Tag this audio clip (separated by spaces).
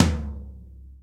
drum figure kit tom